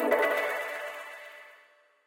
this is a new series these are made from sampling my acoustic guitar and processing everything in renoise multiple resampling and layerings
button, click, cute, design, effect, elements, future, futuristic, game, gui, interface, menu, positive, sci-fi, sfx, sound, sound-design, success, ui, up